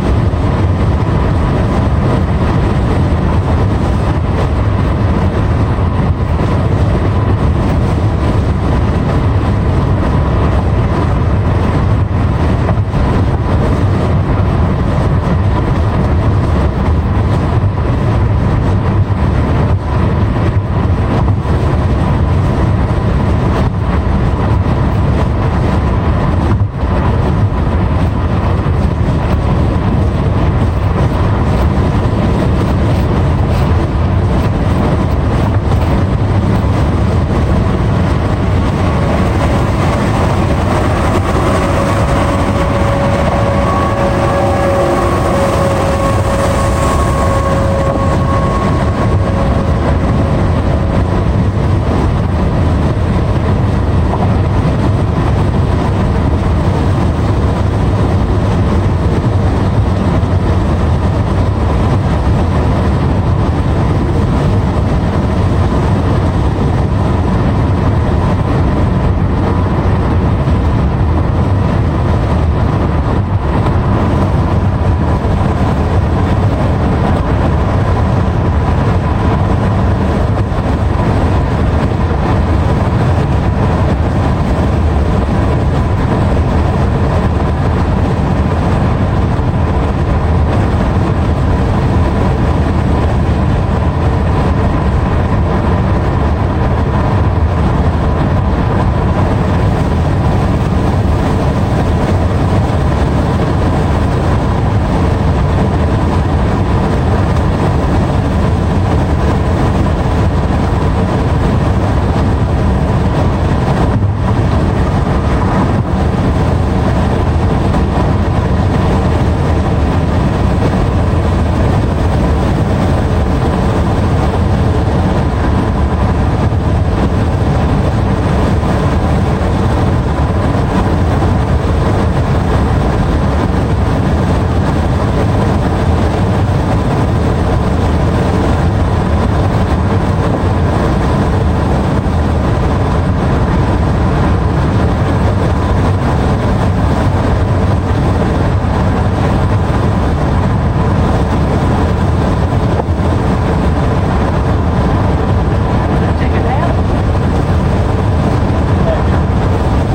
Recorded from inside a car going ~65MPH with a broken Skullcandy headset microphone. All windows were open.
Car, driving, noise, open, white, whitenoise, wind, window, windows